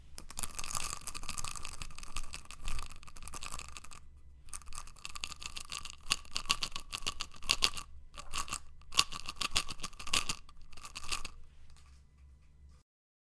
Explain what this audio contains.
Pills in Bottle Open
Pills shaking in an open bottle.
pills, bottle, open